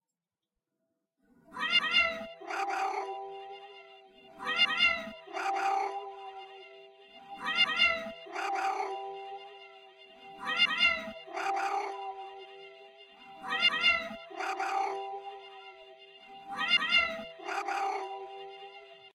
ringtone trippy cats
Trippy cats ringtone sound made from my 4 cat's meows.
trippy, cats